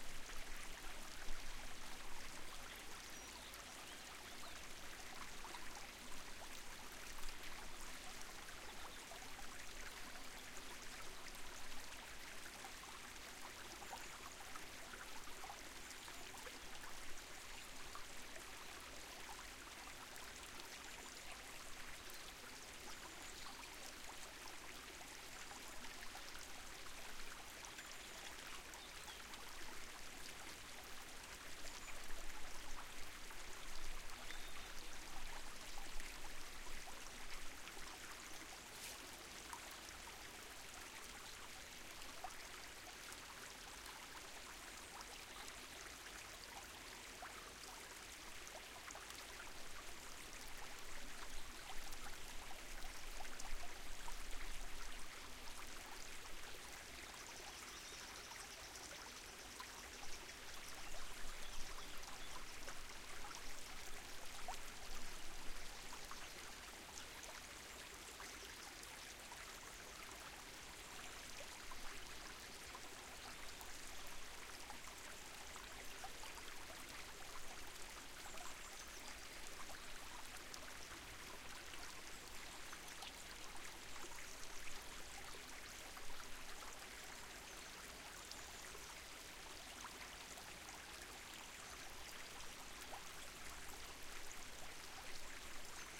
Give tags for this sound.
stream spring birdsong woods bird early-spring water flowing nature ambiance field-recording ambience ambient forest birds

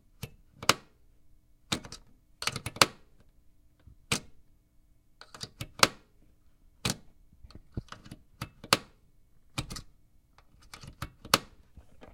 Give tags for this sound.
analog click clicks

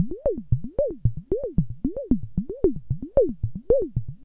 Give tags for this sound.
analog-synth bleep LFO microcon rhythm technosaurus loop

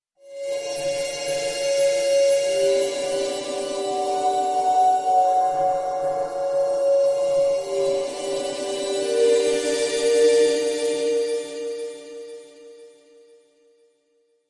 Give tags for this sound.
future sound-design pad engine impulsion hover spaceship electronic ambience effect sci-fi atmosphere soundscape Room futuristic energy emergency drive dark bridge noise deep machine fx ambient rumble starship background space drone

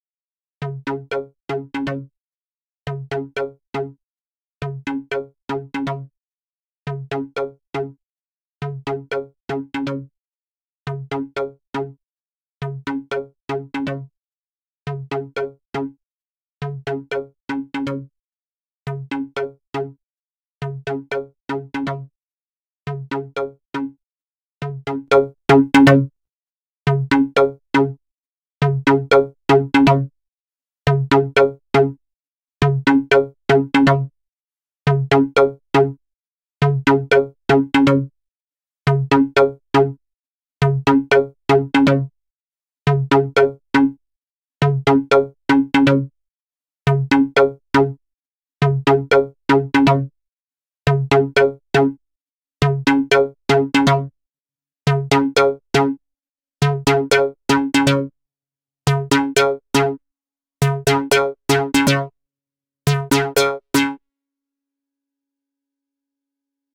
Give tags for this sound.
Modular W0 Analog

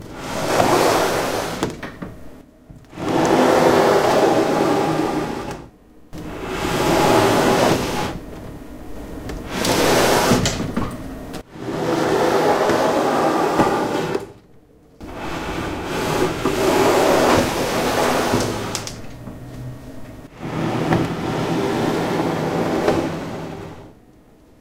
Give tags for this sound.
texture stealing push metal move treasure iron chest pull box movement steel thief moving grind container steal

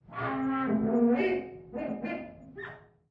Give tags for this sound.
bathroom cleaning glass mirror resonance

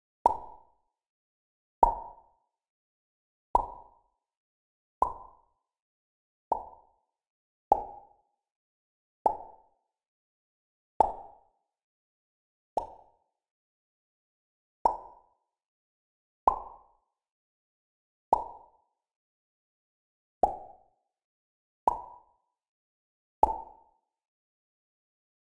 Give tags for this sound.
lips pop popping pops mouth

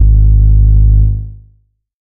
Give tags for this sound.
reaktor basic-waveform multisample saw

